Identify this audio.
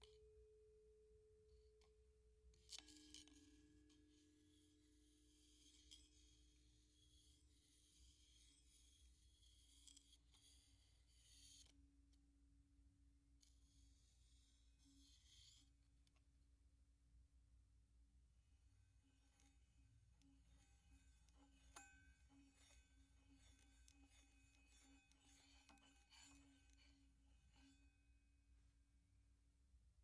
Bwana Kumala Ugal 02
University of North Texas Gamelan Bwana Kumala Ugal recording 2. Recorded in 2006.
gamelan, bali